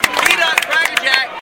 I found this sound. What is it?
"buy me some peanuts and cracker jacks..." sung by crowd at cyclones game.